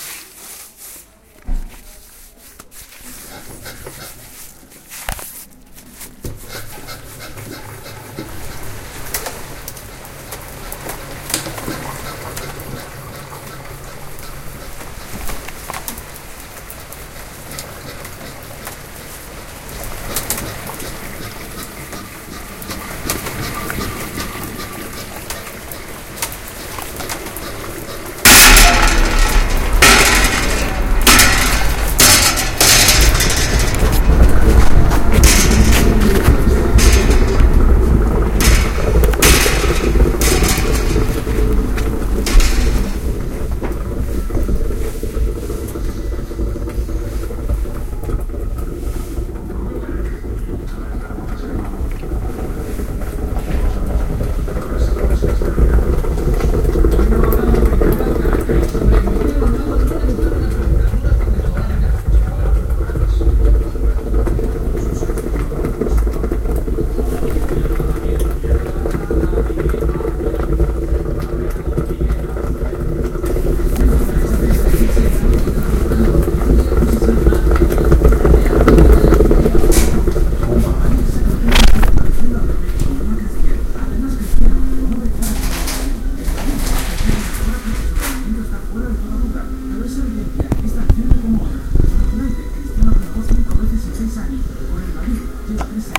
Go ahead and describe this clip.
Sonic Postcard AMSP Mebi Yesica
CityRings, SonicPostcard, Spain